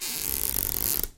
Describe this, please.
Squeaks made by running a finger across a stretched plastic grocery bag
plastic, bag, squeak